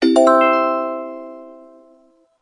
Crrect answer3
retro, arcade, button, gaming, game, games, video, video-game